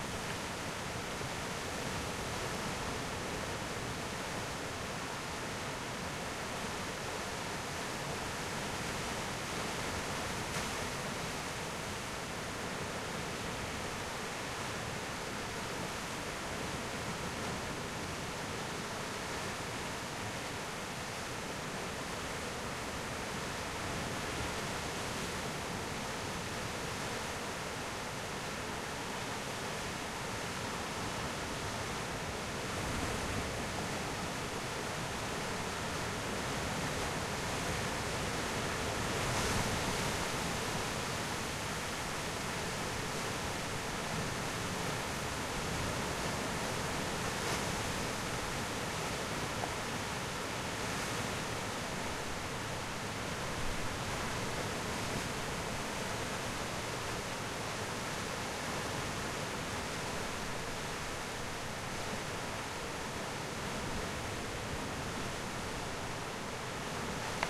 Wellen am Meer
Just some wave records
coast, sea, shore, wave